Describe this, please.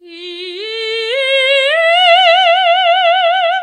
Classic soprano singing four notes as in a warm-up.
singing, soprano, warm-up